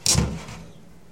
Sounds made by throwing to magnets together onto drums and in the air. Magnets thrown onto a tom tom, conga, djembe, bongos, and in to the air against themselves.